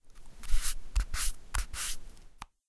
Wiping the skin of an apple three times with a very bright, dense timbre. The amplitude was raised slightly to achieve a workable volume. Recorded in a hifi sound studio at Stanford U with a Sony PCM D-50 very close to the source, a yellow/green golden delicious.